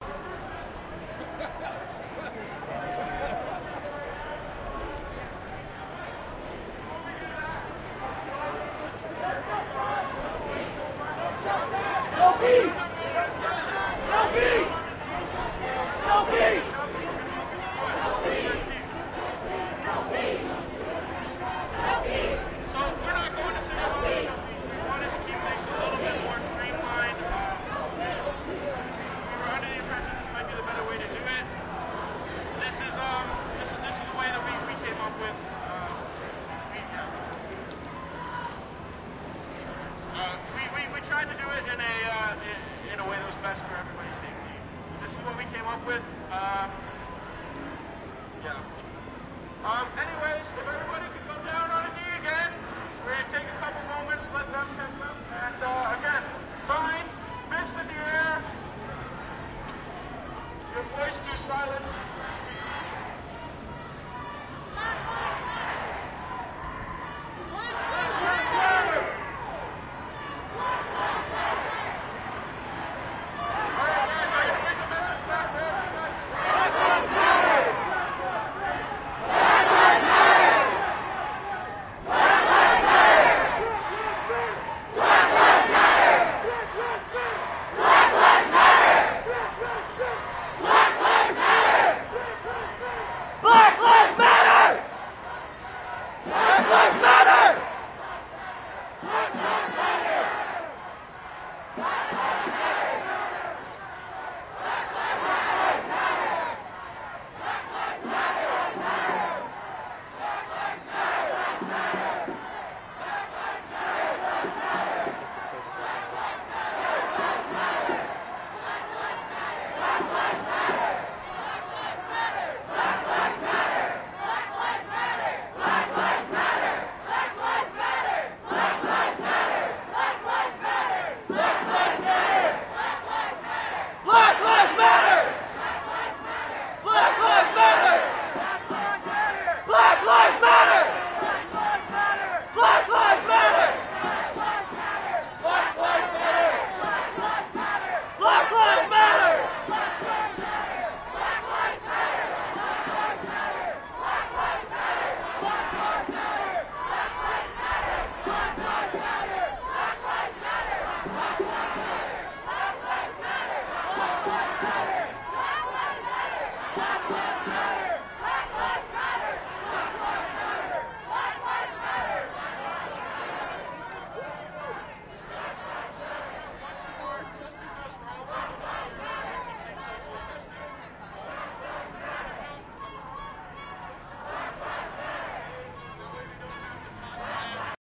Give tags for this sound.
crowds field-recording hands-up-dont-shoot protest march no-justice-no-peace 2020 black-lives-matter toronto

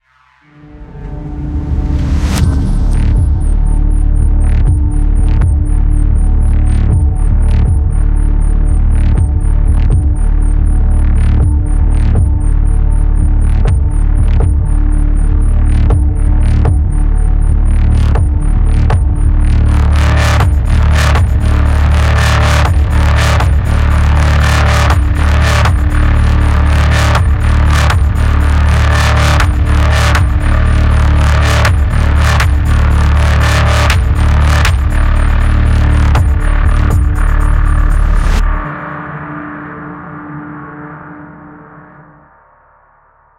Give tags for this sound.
atmosphere synth cinematic music sci-fi electronic dark

